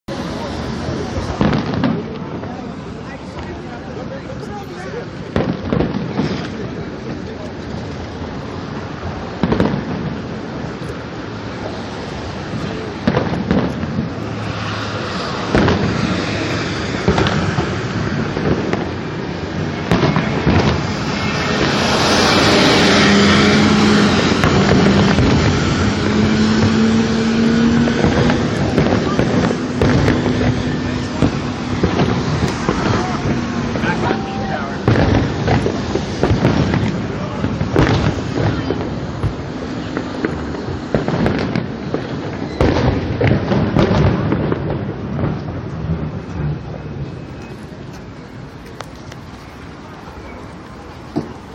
Fireworks-Street2
Walking down a semi-busy street with fireworks exploding in the background. Cars and trucks driving by. Similar to a street with explosions or gunshots in the background. Clip # 2.